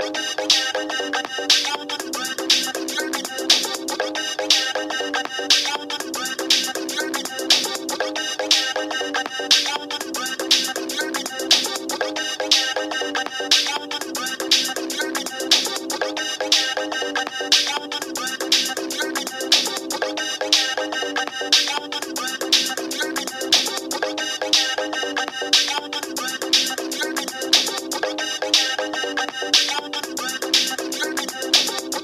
Wah Wah Wah Wah
Short little upbeat electronic music loop
beat, electronic, happy, loop, music